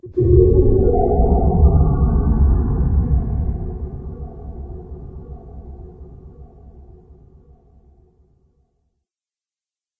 underwatery echo scape synthesized with orangator, lots of reverb and a short sequence.